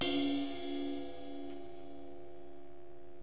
splash ting 1
This was hit with my plastic tip on a 17" ride.
crash, cymbal, drum, drums, e, funk, live, loop, recording, rock